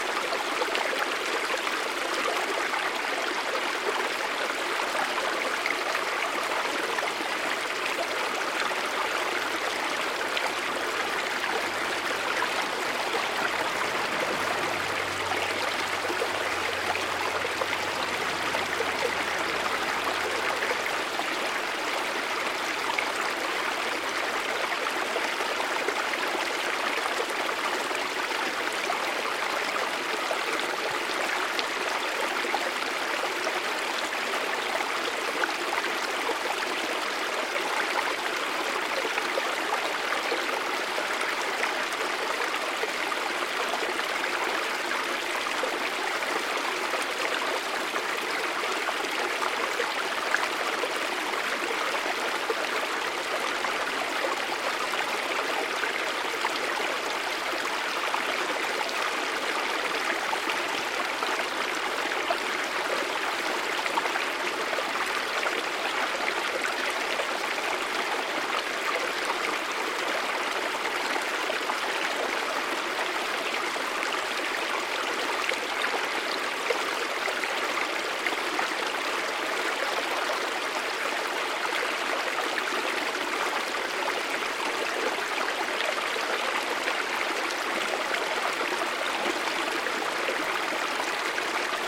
burton waterfall 02
A small man-made waterfall. Recorded with a Rode NTG-2 mic into a modified Marantz PMD661.